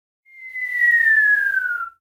Silbido bomba cayendo
bomb falling down